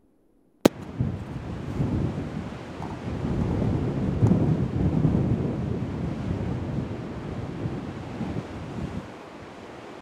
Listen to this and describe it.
FX - viento